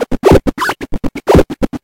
FLoWerS 130bpm Oddity Loop 018
Another somewhat mangled loop made in ts404. Only minor editing in Audacity (ie. normalize, remove noise, compress).
electro, experimental, loop, resonance